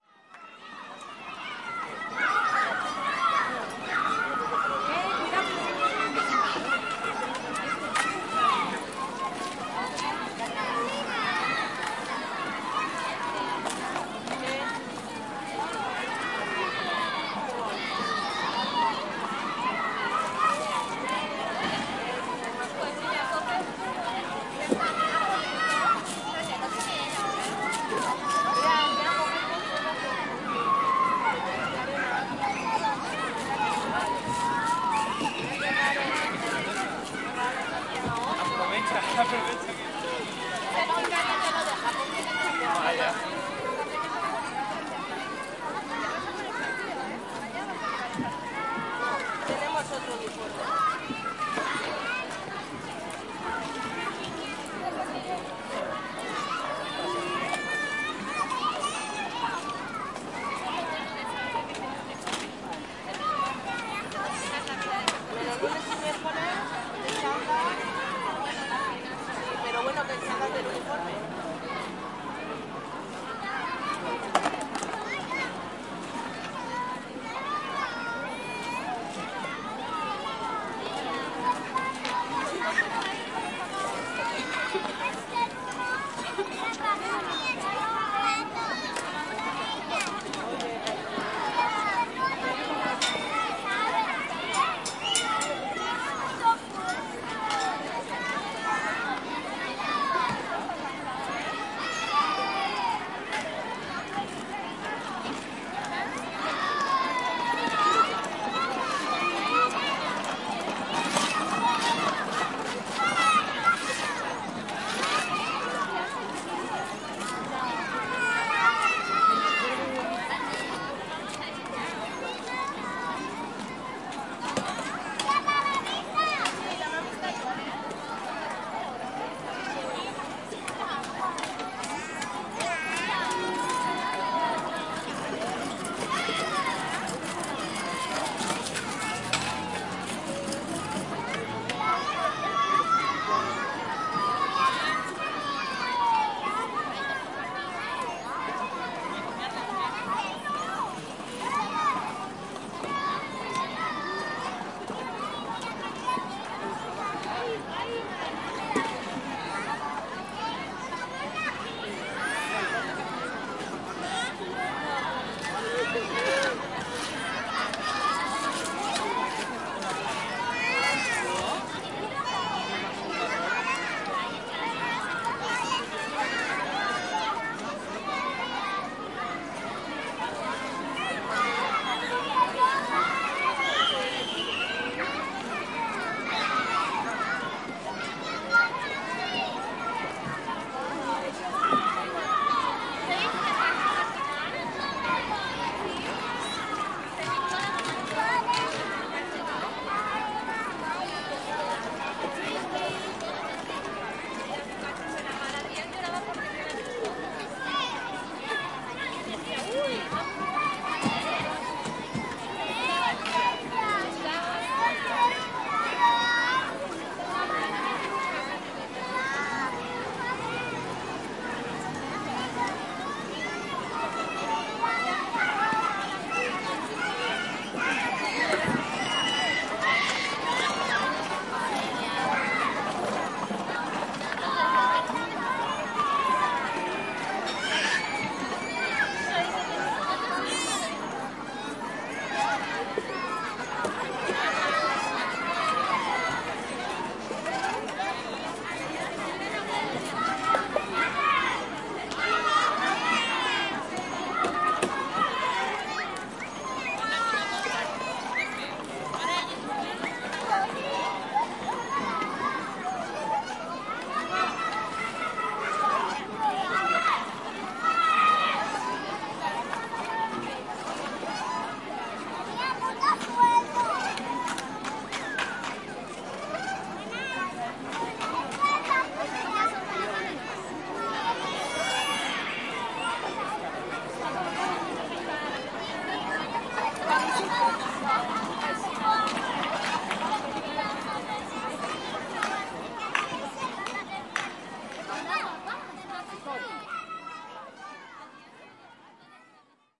PLAYGROUND GANDIA SPAIN
Recording a small playground with children and their parents in an autumn afternoon in Gandia Spain